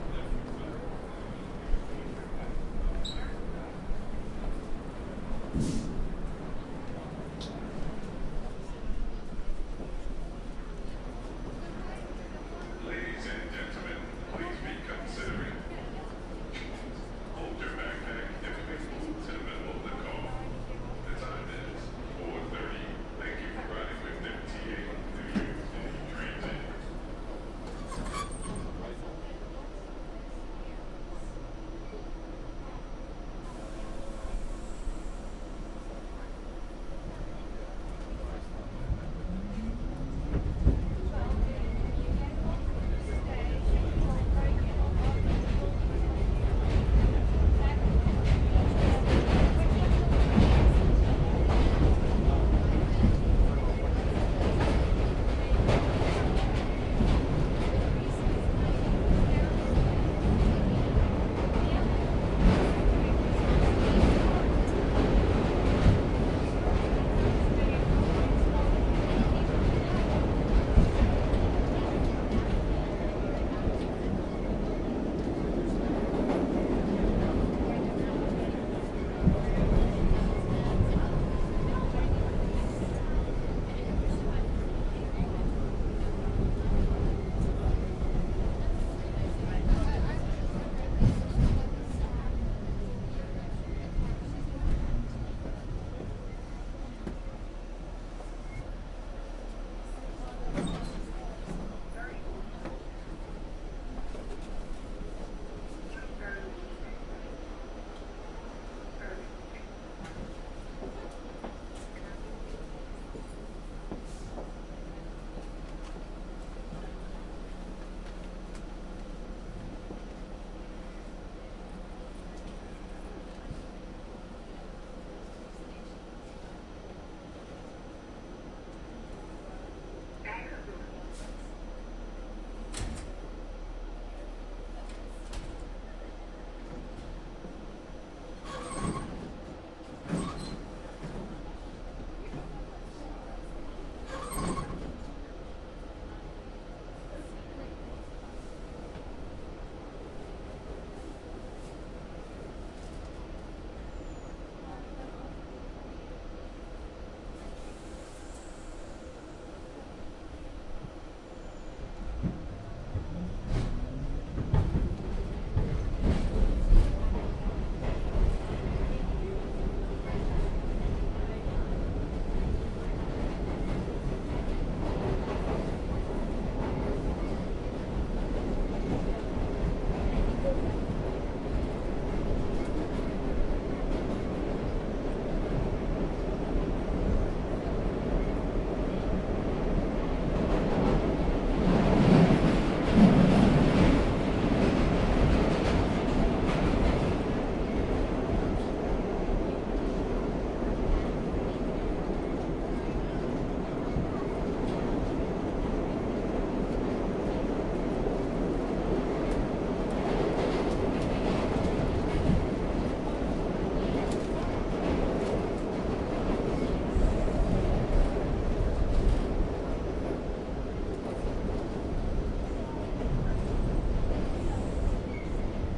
I made this recording riding on the NYC subway system. You can hear general ambiance, chatter, announcements, and train sounds. This recording was made on the C line heading south from 42nd Street to 23rd Street on the afternoon of 25 March 2008 with a Zoom H4. Light post-production work done in Peak.